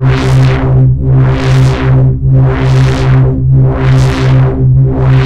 ABRSV RCS 021
Driven reece bass, recorded in C, cycled (with loop points)
heavy, drum-n-bass, harsh, bass, driven, reece